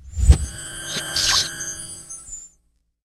pick up magic diamonds
science-fiction fantasy film designed